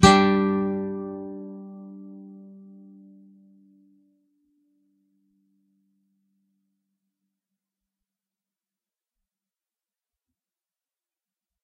G2 thin strs
Standard open G chord except the E (1st) string which has the 3rd fret held; but the only strings played are the E (1st), B (2nd), and G (3rd). Up strum. If any of these samples have any errors or faults, please tell me.
clean, guitar, nylon-guitar, open-chords